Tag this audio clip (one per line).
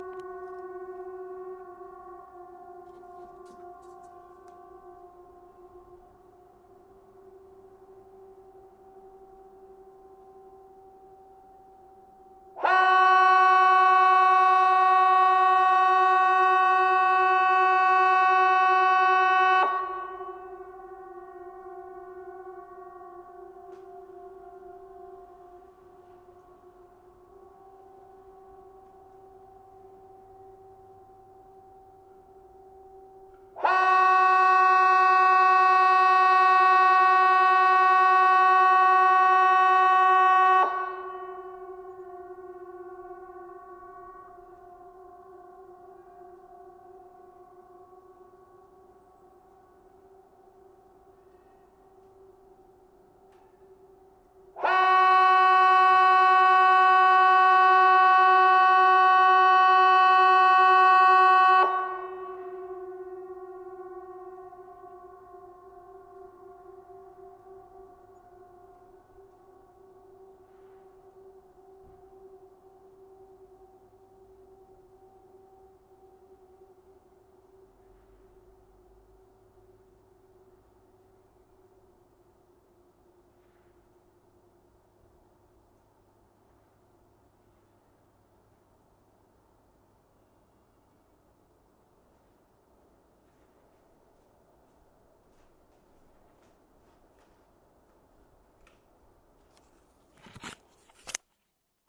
alert
emergency